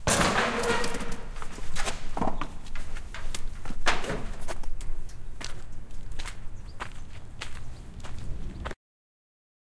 passing from inside factory to outside
Recorded at an abandoned factory space in Dublin Ireland. With Zoom H6, and Rode NT4.
exist, industrial, leave, movement, noise, space